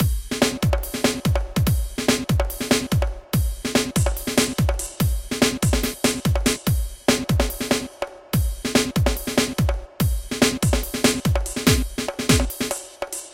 jungle drum loop
bass, custom, drum, jungle